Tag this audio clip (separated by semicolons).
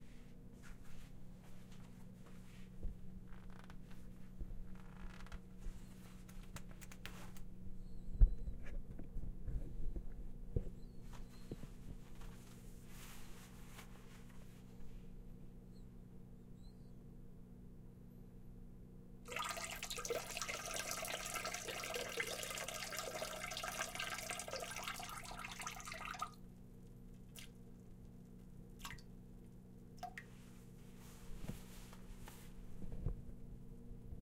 field-recording; H6; pissing